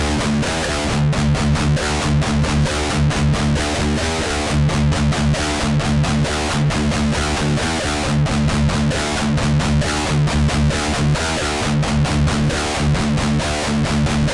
REV GUITAR LOOPS 1 BPM 133.962814
all these loops are recorded at BPM 133.962814 all loops in this pack are tuned 440 A with the low E drop D
GUITAR-LOOPS, HEAVYMETALTELEVISION, 2INTHECHEST, 13THFLOORENTERTAINMENT, DUSTBOWLMETALSHOW